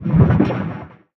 as always, sounds are made on linux using the various softsynths and effects of the open source community, synthesizing layering and processing with renoise as a daw and plugin host.